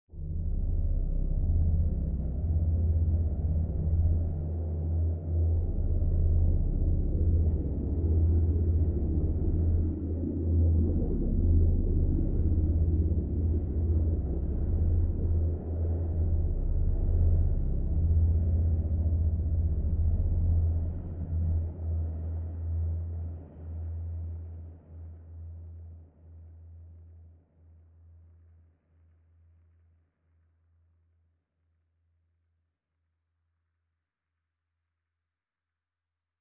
Spaceship UFO Hovering Drone loopable Effect
This sound is a very ominous UFO hovering drone sound that is loopable to use
Hovering, drone, ufo, ambient, tail, space, loop